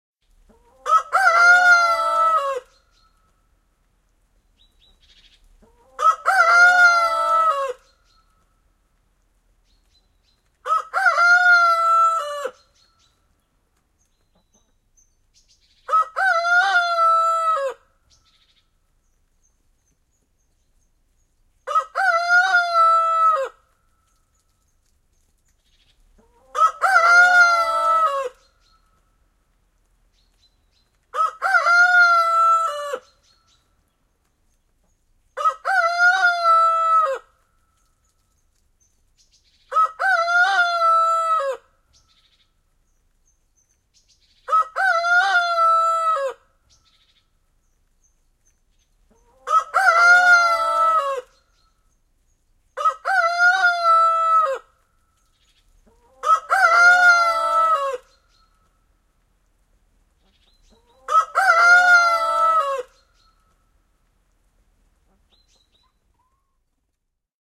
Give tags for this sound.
Siipikarja
Yleisradio
Finland
Kiekua
Poultry
Suomi
Domestic-Animals
Soundfx
Finnish-Broadcasting-Company
Yle
Field-Recording
Tehosteet
Animals
Cock
Kukko

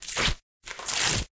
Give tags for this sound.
paper
rip
ripping
tear
tearing

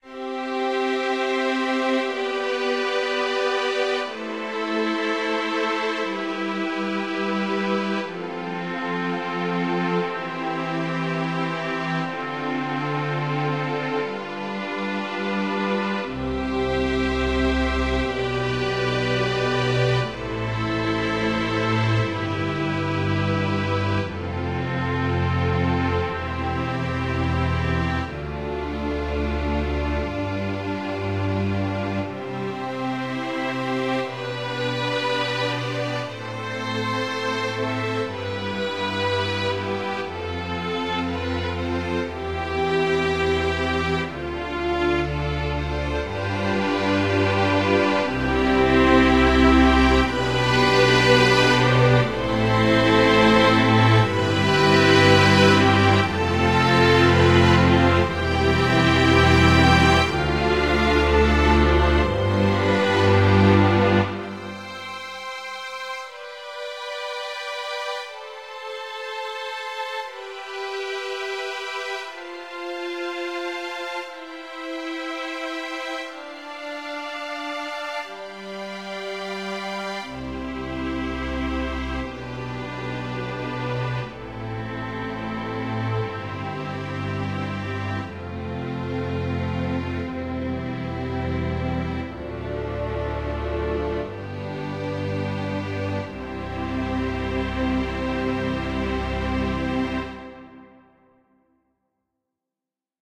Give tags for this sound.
String-Orchestra
Sad
Strings
Beautiful
Romantic
Heaven
Orchestra
Lovely
Squidfont-Orchestral

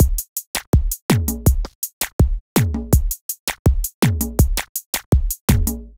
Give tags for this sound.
beat
zouk
loop
drum

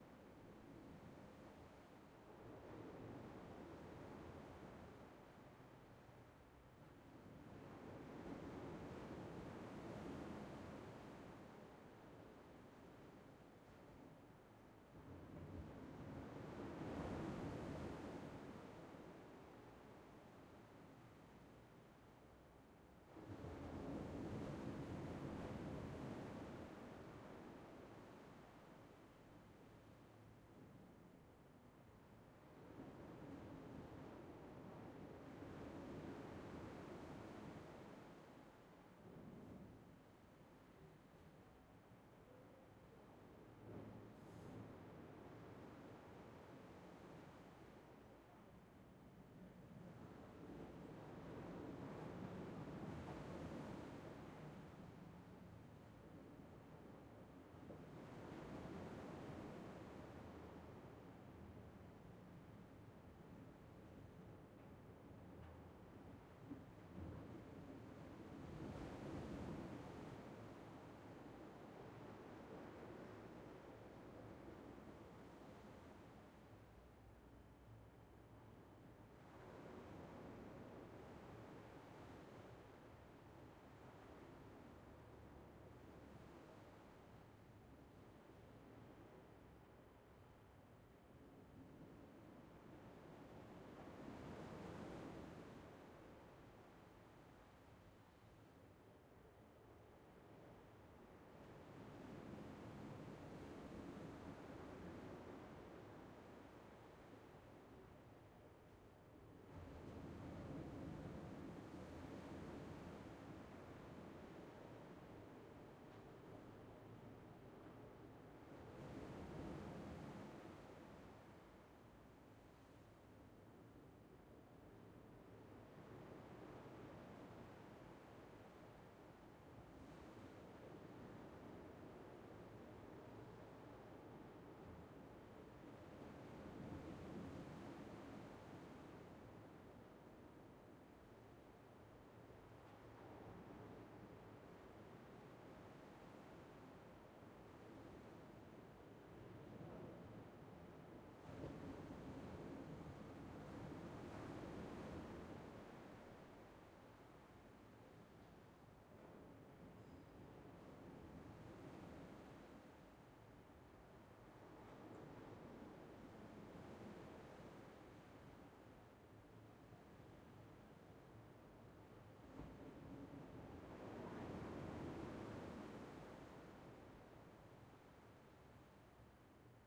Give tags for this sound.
Far
Low
Ocean
Slow
Turkey
Waves

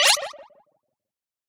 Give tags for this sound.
gamedeveloping
videogames
gaming
futuristic
ui
sfx
video-game
navigate
electronic
click
menu
electric
synth
gamedev
sci-fi
games
indiedev
game
indiegamedev
select

